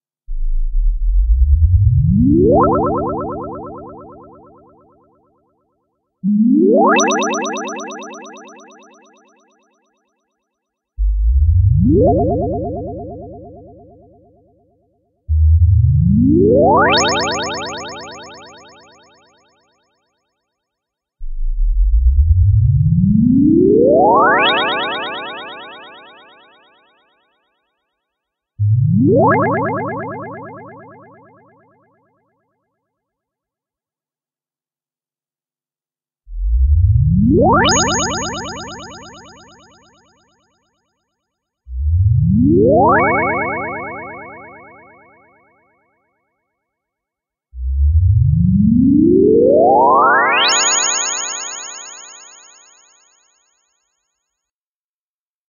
Sci Fi Wooshes ascending 01
4/5 - a retro sounding warp/woosh sci-fi sound effect with delay.